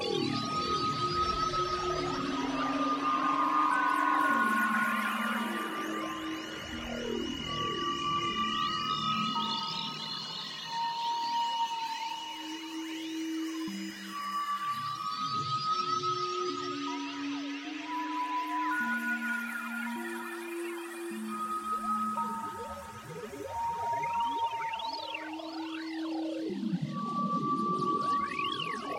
Ambience AlienPlanet 00
An otherworldly ambient looping sound to be used in sci-fi games. Useful for creating an eerie alien environment where everything seems weird and unexplored.
alien, ambience, atmospheric, futuristic, game, gamedev, gamedeveloping, games, gaming, high-tech, indiedev, indiegamedev, science-fiction, sci-fi, sfx, soundscape, video-game, videogames